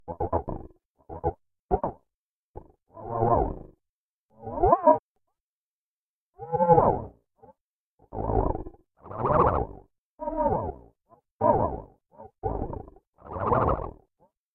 gutteral guys
Synth talking with amplitude modulation.